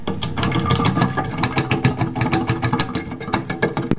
Sounds For Earthquakes - Wood 2
I'm shaking something wood. Recorded with Edirol R-1 & Sennheiser ME66.
motion, shaking, rumble, earthquake, stirred, wooden, rumbling, shaked, rattle, quake, wood, collapsing, collapse, stutter, waggle, shudder, falling